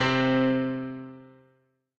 layer of piano